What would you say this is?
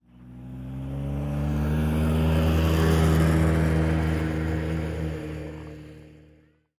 snowmobile pass by medium speed
snowmobile, pass, by